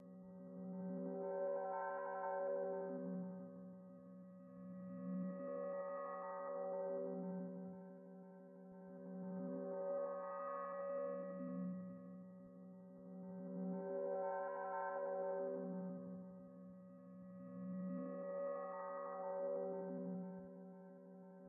Forbidden Planet 4
A collection of Science Fiction sounds that reflect some of the common areas and periods of the genre. I hope you like these as much as I enjoyed experimenting with them.
Noise, Electronic, Spacecraft, Mechanical, Alien, Space, Machines, Futuristic, Sci-fi